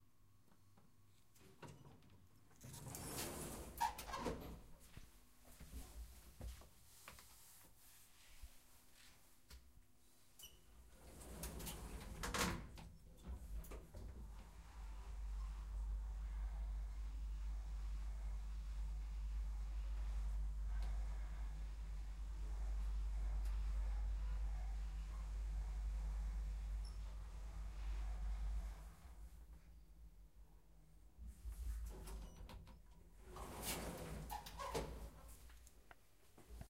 4 Lifts opening and closing doors
elevator, opening, door, open, lift, close